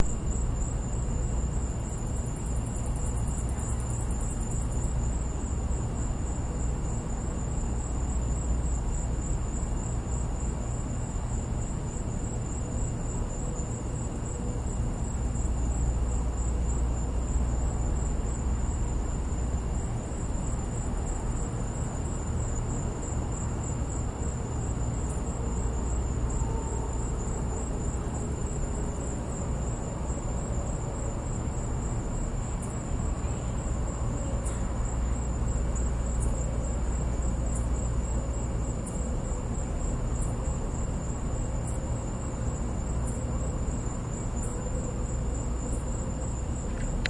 SonyECMDS70PWS outside ext2
electet, test, digital, microphone, field-recording